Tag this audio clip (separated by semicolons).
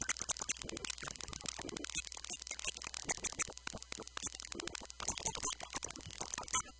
noise; speak-and-spell; music; circuit-bending; micro; digital; broken-toy